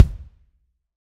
BKE KICK 003
This is a hybrid real/sampled kick based on a Pro Tools studio recording of a drum kit and a popular drum machine sample. The real kicks in this sample pack are Taye, Yamaha, DW and Pearl whilst the samples come from many different sources. These "BKE" kicks were an attempt to produce the ultimate kick sound and contain a large number of layered kicks both live and sampled.
hybrid, drum, kick, studio, real, sample